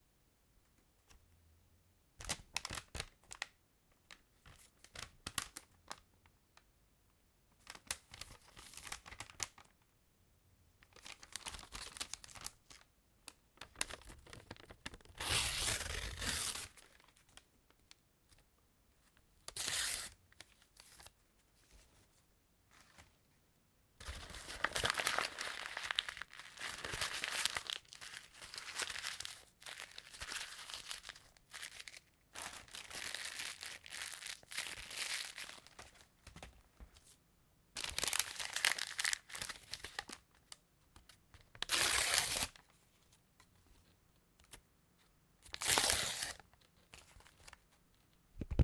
recorded by ZOOM H1
tear paper and plastic paper
tear, paper, rip